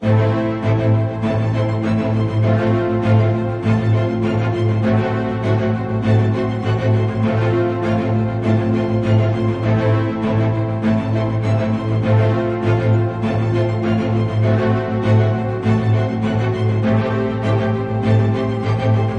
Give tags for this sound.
Strings
Loop
Melodic

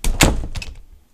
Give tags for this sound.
close
closing
door
quiet
sad
shut
shutting
wooden

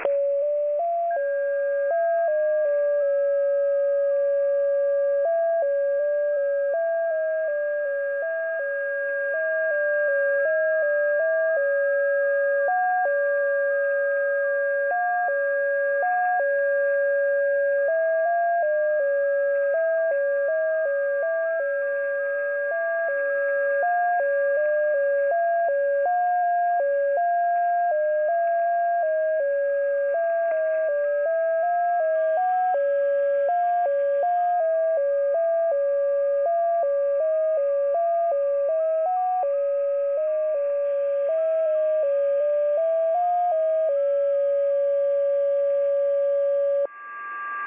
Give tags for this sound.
melody
mysterious
encrypted-content
numbers-station
14077
static
tones
shortwave
creepy
radio
music
the-14077-project
mystery

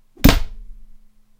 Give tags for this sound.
fx
hit
rumble

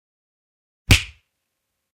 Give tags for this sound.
punch
cartoon

